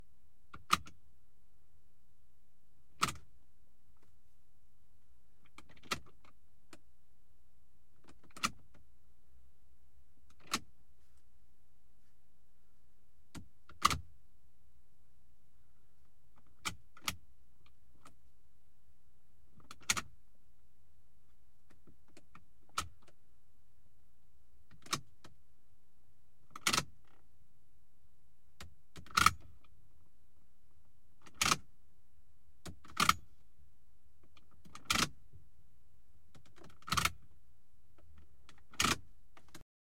The HVAC fan speed slider from a Mercedes Benz 190E, shot from the passenger seat with a Rode NT1a.
dial, dyno, fader, knob, photo, preview, process, recording, sessions, slider, switch, test